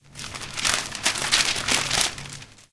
Taking a newspaper
In Tallers Building at Poblenou Campus (UPF) in the vending machine area.
campus-upf, hands, newspaper, taking, UPF-CS12